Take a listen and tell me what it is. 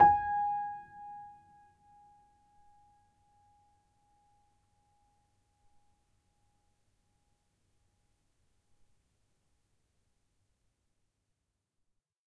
upright choiseul piano multisample recorded using zoom H4n
choiseul, multisample, piano, upright